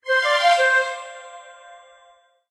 roll-good
This is a notification for a good roll of the dice in an online game. Created in GarageBand and edited in Audacity.
synthesized, digital, electronic, notification